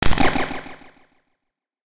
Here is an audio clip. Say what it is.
Retro Game Sounds SFX 101
audio, effect, electronic, freaky, gameaudio, gameover, gamesound, gun, sfx, shooting, sound-design, sounddesign, soundeffect, weapon